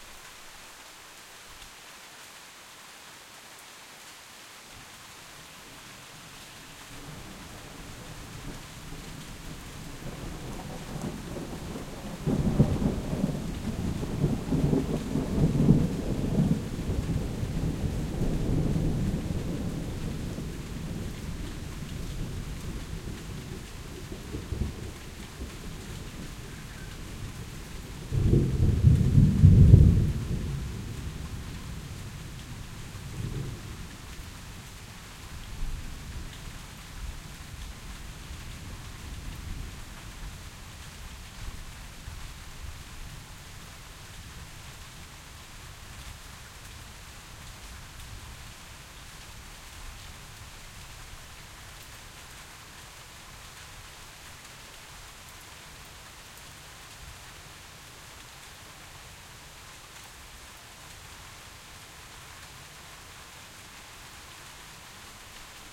Rain and Thunder in stereo.
Recorded using Zoom H5 and XYH-6 Mic